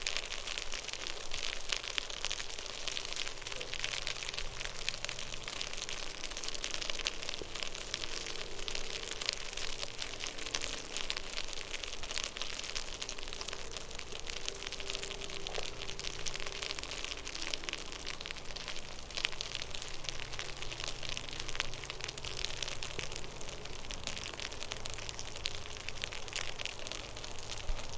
Large Green Sawfly Larvae 02
Group of large green sawfly larvae, indoors on a 3mm melamine board. About 50 individuals were in the group, recorded with a Rode NT55 mic on a Marantz PMD661, 13 October 2017, 3:01PM. The mic was about 100mm from the group.
The larvae make a soft, continuous, hissing/crackling sound, with the occasional thump with their tails to indicate their presence to others in the group. They live on eucalyptus leaves, often descending to the ground and moving in a swarm to another location.
Take with a grain of salt anyone who says these larvae strip trees. We've had thousands of these larvae in our yard over the past 30 years, and have never had a problem amongst our dozen or so gum trees. I've also noticed them across the road on young gum trees only 3mm high – and again, little evidence of leaf-stripping.
They're a beautiful larvae, a delight to watch, photograph and record.
field-recording, Tasmania, insect